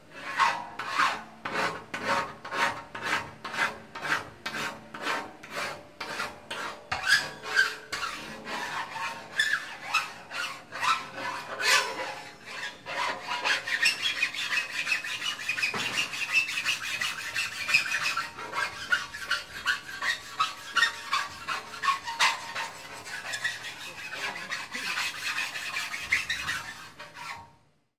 Filing Hand Aluminium 8mm thk 1
Hand filing 8mm thick aluminium panel
metal, Metallic, Factory, field-recording